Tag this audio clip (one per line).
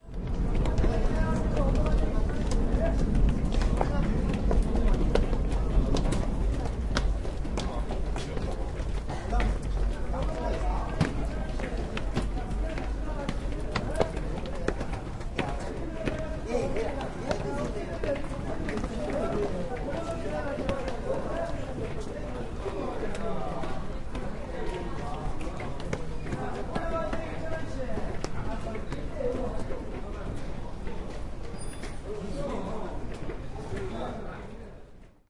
field-recording
footsteps
korea
korean
seller
seoul
voice